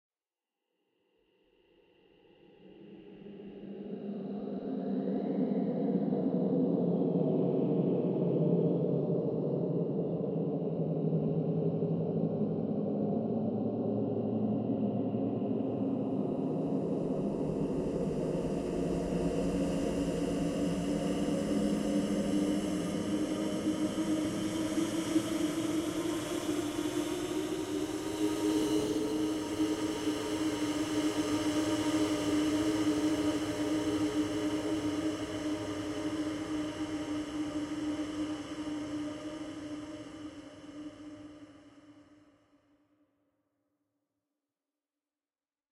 Just some examples of processed breaths from pack "whispers, breath, wind". This is a compilation of granular timestretched versions of the breath-samples.